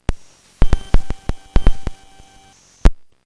These are TR 505 one shots on a Bent 505, some are 1 bar Patterns and so forth! good for a Battery Kit.
beatz, bent, circuit, drums, glitch, hammertone, higher, hits, than